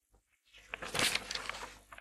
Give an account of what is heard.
The sound you make when wolding a page up to your face. Recorded and mixed using Audacity.
read, paper, page